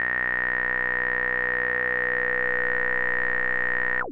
Multisamples created with subsynth using square and triangle waveform.
multisample, square, triangle, subtractive, synth